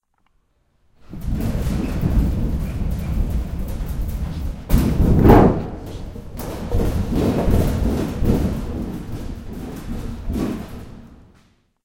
Metal Sheet Flex
Flexing a 3ft by 5ft piece of sheet metal back and forth along the ground to get a ringing rasping quality when shaken fast enough. The metal sheet was scrap and already had several holes which made it easier to manipulate back and forth.
scraps, scrape, aip09